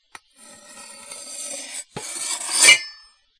Super Slow-Slide
Like the slow-slide, except this one's SUPER SLOW metallic slide, made with a meat cleaver on a plastic cutting board.
Super fun to make.